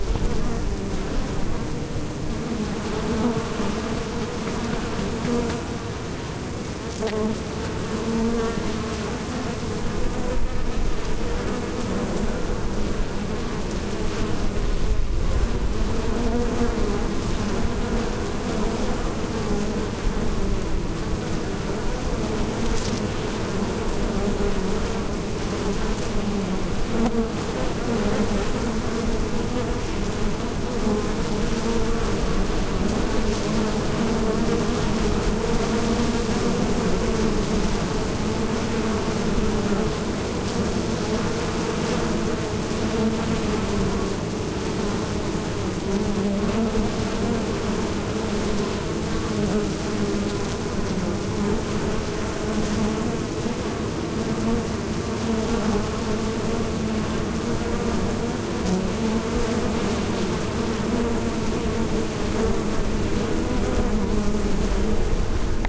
Bees airport
recorded in front of a beehive. 15 cm from the landing and starting area ;) Recorder: Olympus LS-5
insects, beehive